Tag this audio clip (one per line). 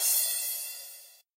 1-shot
hi-hat